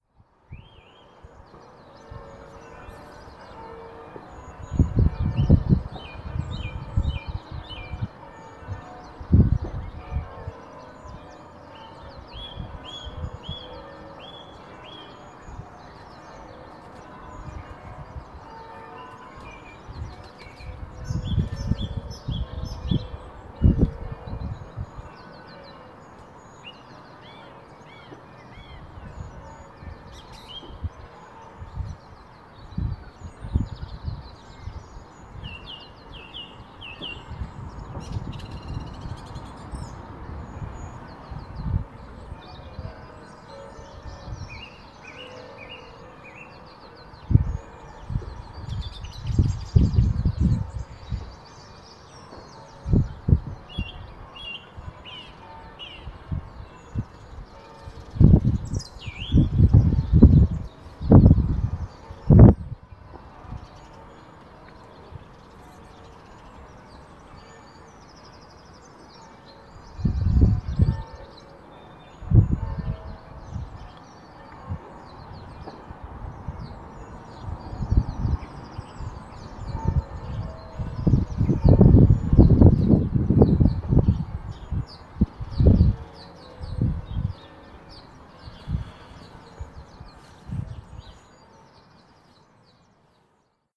Chruch bells on a sunday morning
A lazy Sunday morning listening to the church bells in the distant
churchbells, field-recording, morning